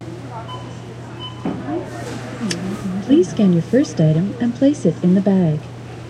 grocery store ambience 1
General grocery store ambience.
cash-register, grocery-store, receipt-printer-sound